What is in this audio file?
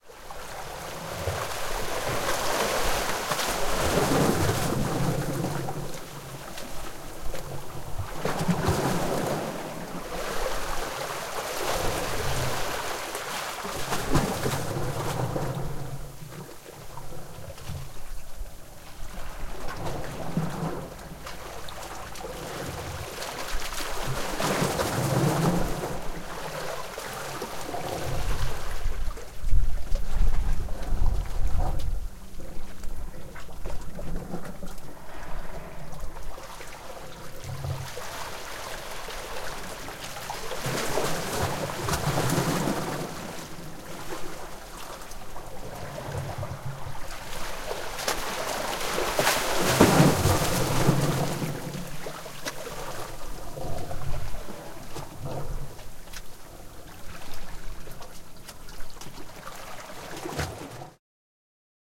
Sea-waves splash gully-090714
Recording of waves in gully. Tascam DR-100
coast, shore, field-recording, monster, sea, gully, gargle, waves